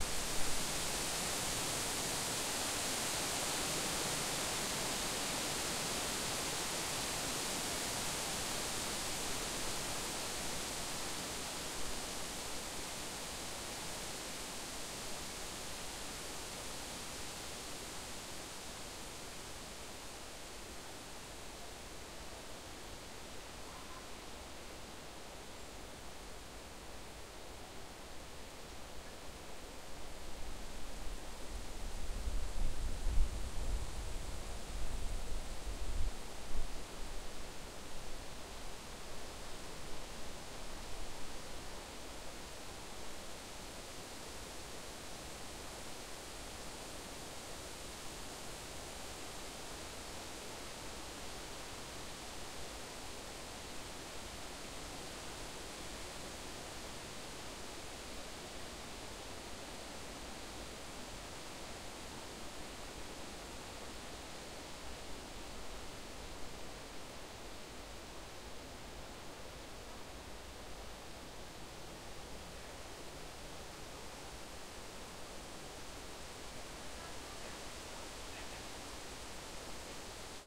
Early autumn forest. Noise. Wind in the trees.
Recorded: 2013-09-15.
XY-stereo.
Recorder: Tascam DR-40